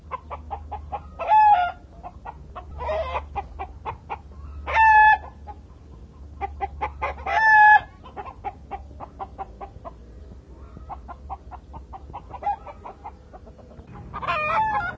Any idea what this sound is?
Chicken clucking
Clucking of a chicken
cluck, sound, crowing, rooster, chicken, hen, clucking